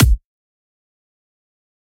it is a kick